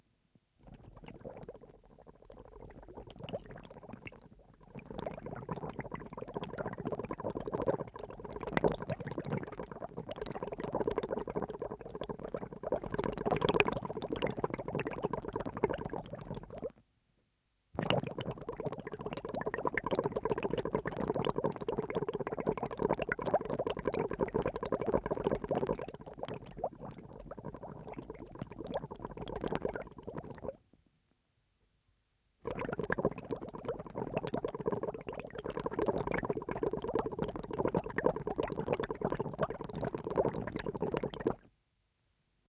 stereo bubbles (straw)
bubbles- made with a straw and recorded just below the water surface.
2x piezo hydrophone-> TC SK48
bubbles, bubble, straw, piezo, stereo, straw-bubbles, hydrophone, underwater